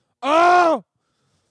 male scream Bram OOH
Bram screams OOH
male, pain, scream, shout